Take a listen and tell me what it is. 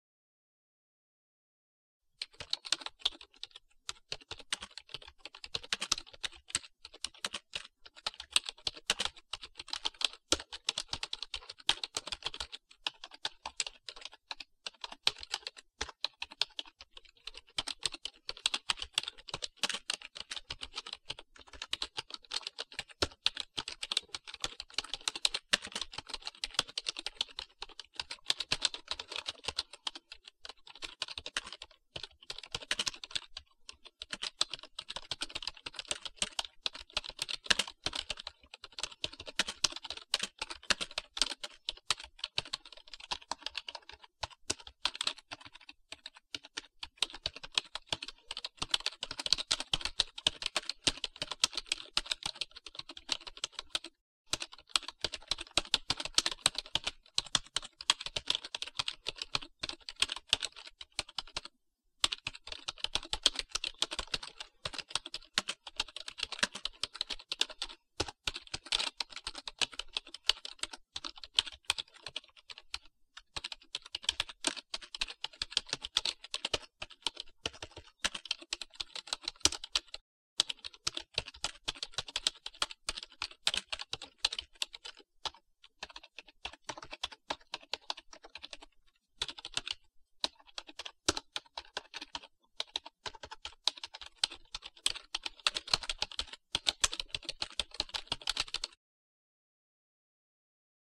keyboard/Teclado typing/teclear 01 foley
Foley form a old keyboard with a NW-700